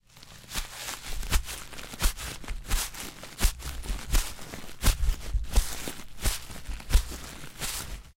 walking in the grass